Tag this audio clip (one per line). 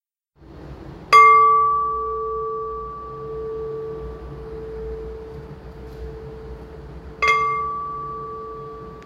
bell
Gong